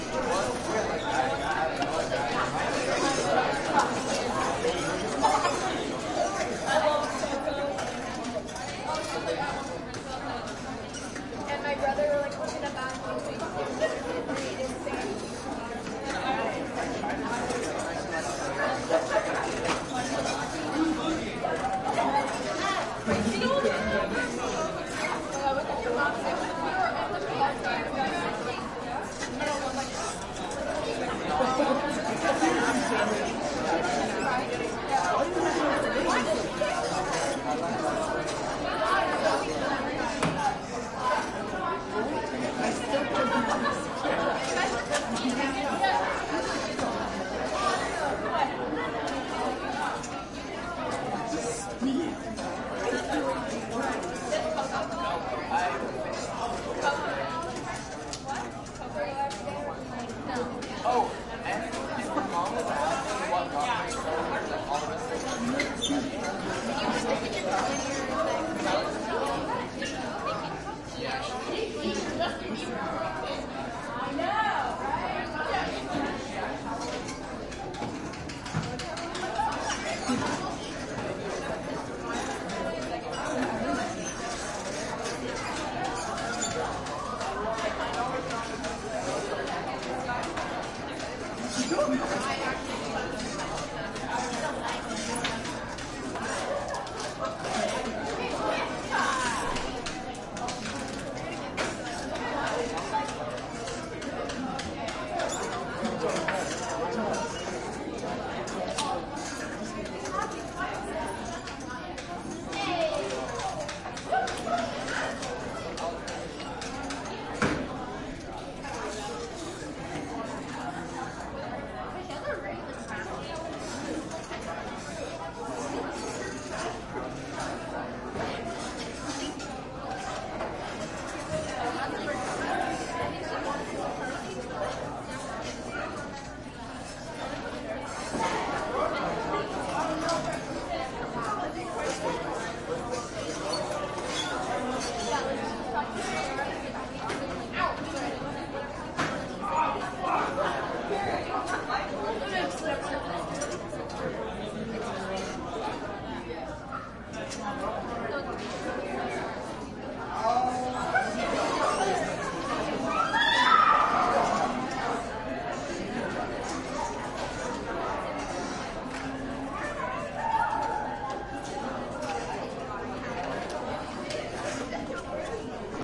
crowd int high school cafeteria medium older seniors busy creak seats close perspective in crowd creaking around you
cafeteria, int, school